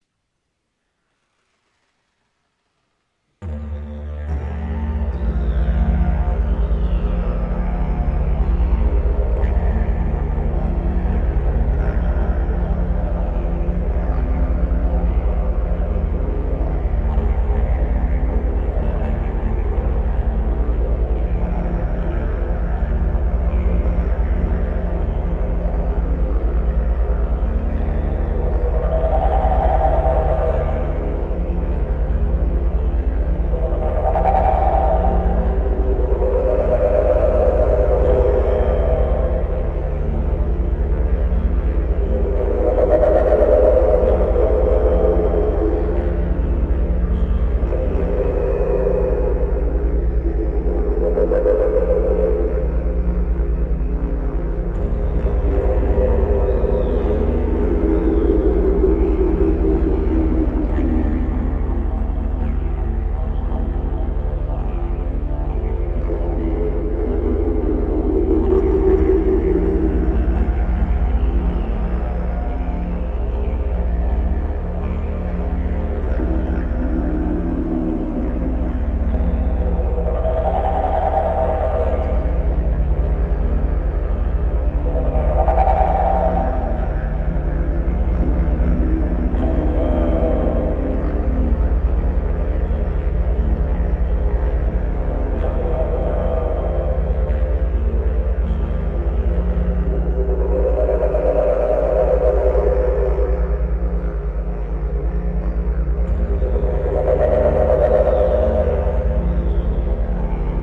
Effected Didge
I put this recording through a 'pitch looper' a program called Cecilia, really cool program if you want to produce something similiar. The original recording I've also uploaded separately was taken with a Rode NT4 mic and with a Didge of mine in the key of B from northern Queensland (Kuranda).